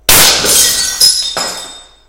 40x40cm WindowGlass PVC 3
Sound of breaking 40x40 cm window glass above PVC floor.